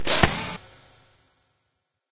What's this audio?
being shot through a chute?
This was one of a few effects I made for a few friends making a side-scrolling video game when they were in college.
This was recorded using a crappy, brandless PC microphone; recorded directly to PC using Sound Recorder in windows 95. Original waveform was a sample of my own voice, with post-effects added.